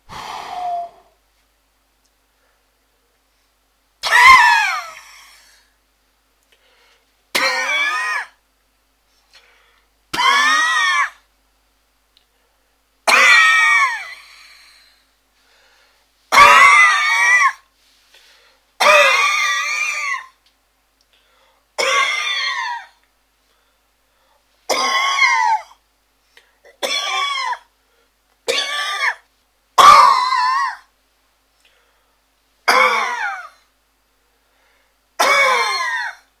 Whiny cough 2
cough, 2, Whiny